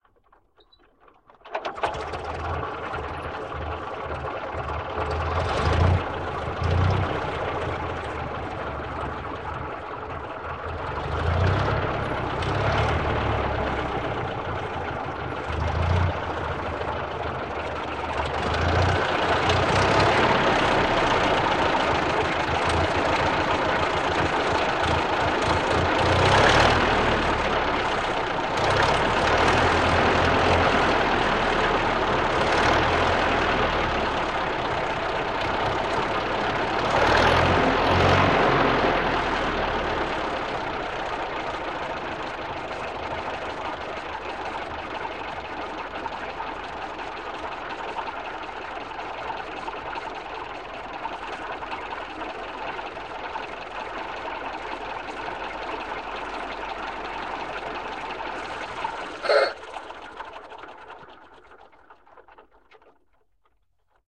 Old Car
vehículo a motor antiguo
Car, Drive, Road, Street, Transport, Vehicle